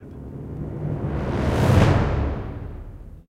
BRAAMS and TIMPANI
orchestral, timpani, braams